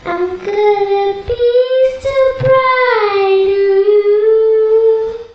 Recorded direct to record producer with clip on condenser radio shack mic. Processed with cool edit... time expanded (stretched).